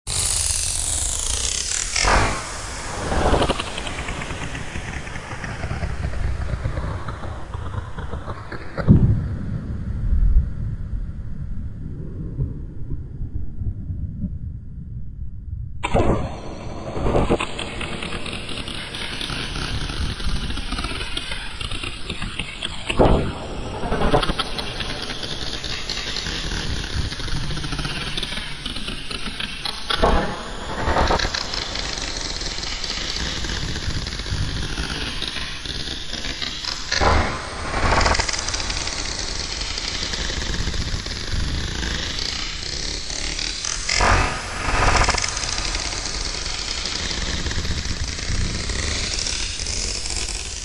Old field recordings originaly made for a friends short film that focussed on the internet and telecommunications. Think I used Reaktor and Audiomulch. I always do my topping and tailing in Soundforge.
field, processed, recording